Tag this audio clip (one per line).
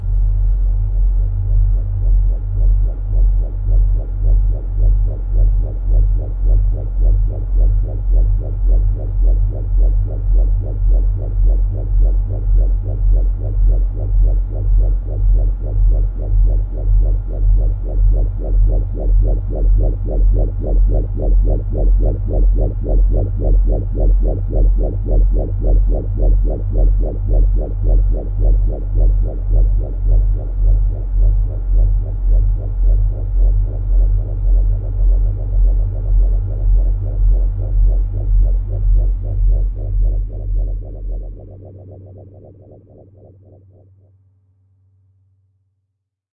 synth
electronic
effect